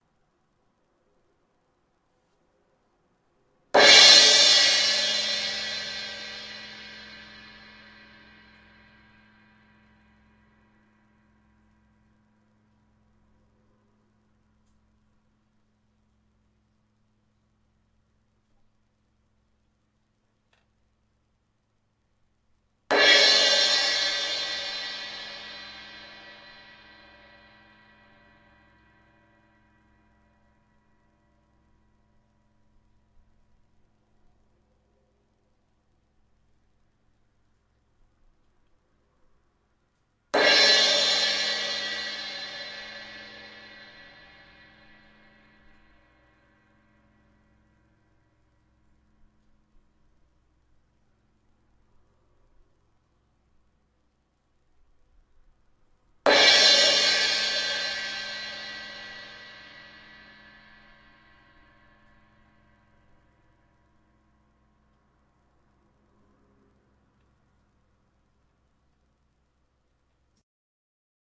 K Custom cymbal crash kevinsticks
K Custom Zildjian crash, a few hits with stick and mallet
crash, hit, percussion